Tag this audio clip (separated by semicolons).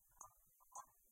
builder; knock; radio; rap; repair; sample; tap